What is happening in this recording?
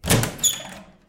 Door open inside03
Opening a wooden door with a squeaky metal handle. Natural indoors reverberation.
door, inside, open